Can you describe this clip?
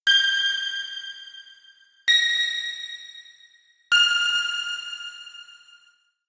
electronic bells
synthesized bells no resonance
electronic, high-pitch